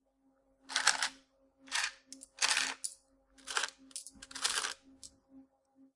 This is the sound of picking up coins from the till. Recorded with a Zoom H2.